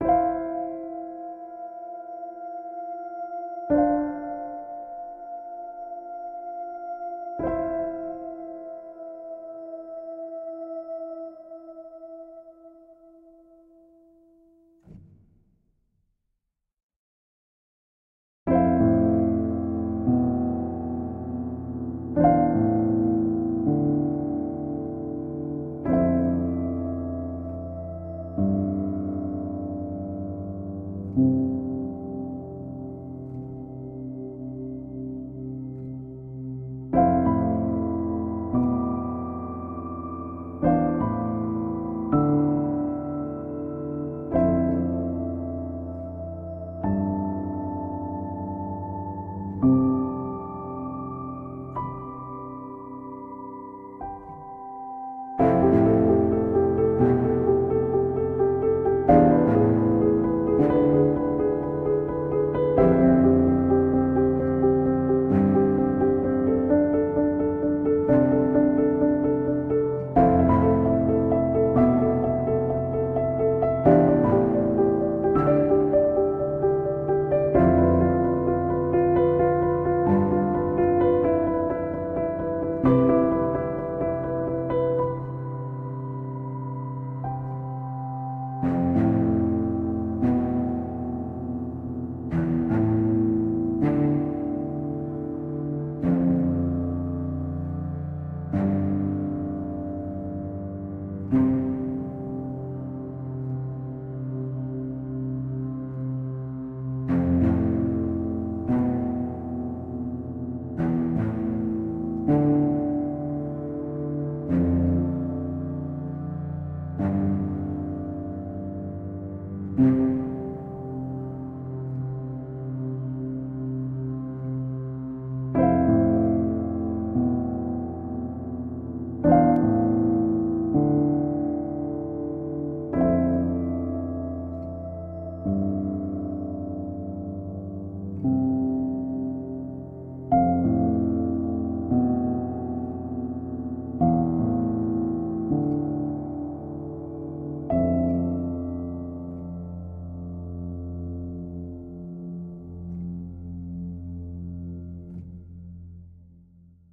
Emotional Original Soundtrack - ROAD
I made this in Fl Studio using piano and strings plugin with an atmospheric feel. Hope you like it :)
ambiance, ambience, ambient, atmosphere, atmospheric, background, beautiful, chill, cinematic, dark, depressing, drama, dramatic, drone, emotional, movie, original, piano, relaxing, sad, soundtrack, strings